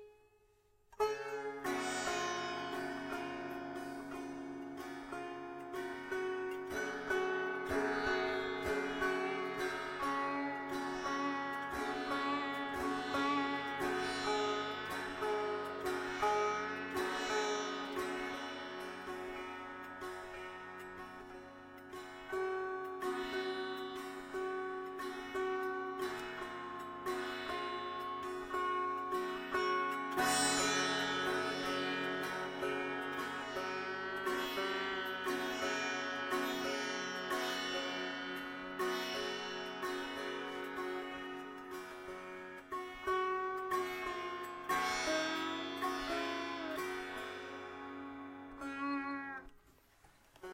Recorded my friend playing the sitar in his room with an H4N